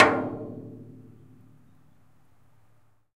hit - metallic - natural gas tank 01
Hitting a metal natural gas tank with a wooden rod.
bang, clang, crash, gas, gas-tank, hit, impact, metal, metallic, natural-gas, percussive, tank, wood, wooden